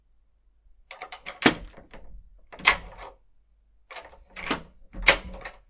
Locking Door
Locking a door.
door, lock, lock-door, locking-door